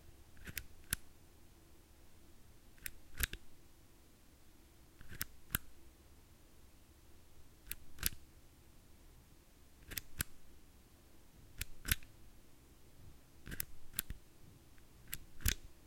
push button 01
Pushing on and off a small plastic button. Recorded with AT4021s into a Modified Marantz PMD661.
button, click, foley, sound-effect, switch, toggle